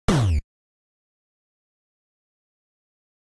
8-bit damage sound
A video game sound effect made with Famitracker that could be used when something takes damage
hit, old, retro, hurt, game